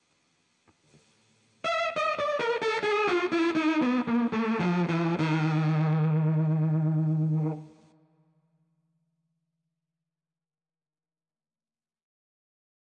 escala de mi en guitarra electrica
PC, software